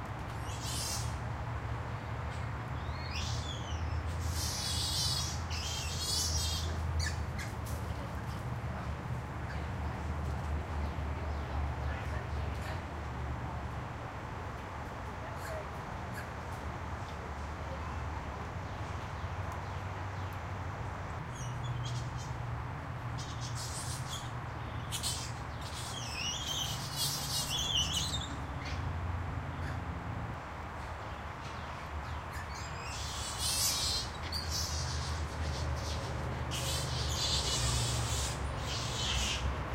A group of Dusky Langur Monkeys chasing each other around their exhibit and squeaking. Recorded with a Zoom H2.
monkey, field-recording, playing, primates, zoo, squeak, langur